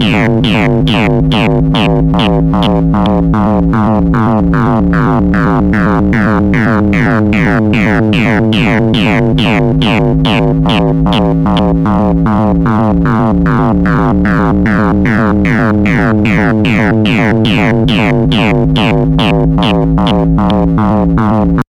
quantum radio snap125
Experimental QM synthesis resulting sound.
noise, drone, sci-fi, experimental, soundeffect